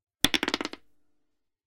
Rolling a dice.
{"fr":"Dé 2","desc":"Lancer de dé.","tags":"de des lancer jouer jeu"}
dice, dumping, game, roll, rolling, rolls